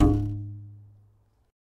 Plucking a rubber band that is strung over some styrofoam. Kind of sounds like a bad cello or something. Recorded with an AT4021 mic into a modified Marantz PMD 661.